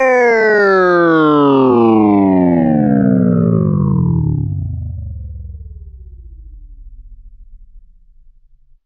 An experiment to see how many sounds I could make from a monophonic snippet of human speech processed in Cool Edit. Some are mono and some are stereo, Some are organic sounding and some are synthetic in nature. Some are close to the original and some are far from it.
processed, voice, sound, falling